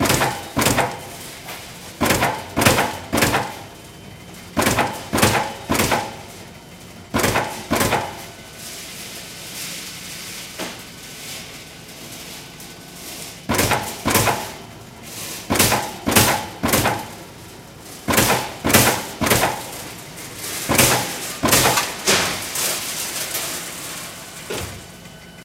A die recorded in a factory...